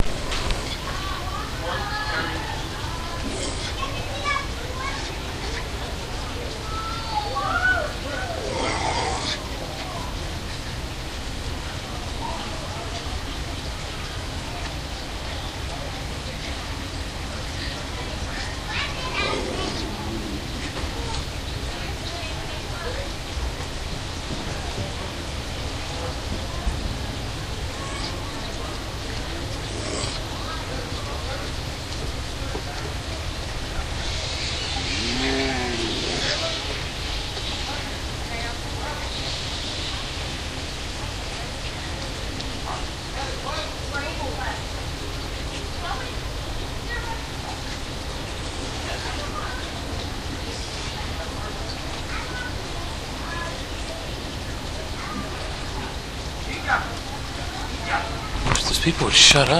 Bobcats pacing and hissing/growling recorded at Busch Wildlife Sanctuary with Olympus DS-40.
ambient, bobcat, cat, hiss